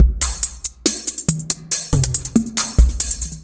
70 bpm drum loop made with Hydrogen